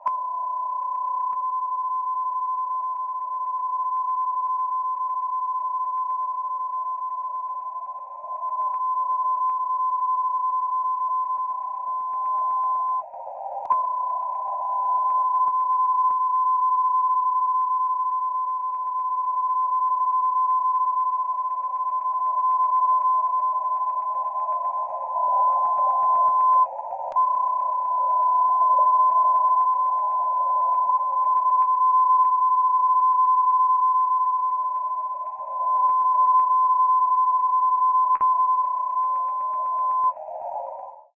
A Phase shift Keying (PSK) signal in Short Wave as heard in a Kenwood TS-950sdx receiver - USB mode / 500 Hz. BW.
Communications, PSK, Radio, Short-wave, Signal